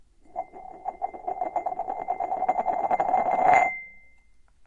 Glass cup twirling
Twirling and ringing sound produced by tottering a medium-sized glass cup on the hardwood floor of the CCRMA recording studio. Recorded using a Roland Edirol at the recording studio in CCRMA at Stanford University.
aip09, cup, glass, ring, totter, twirl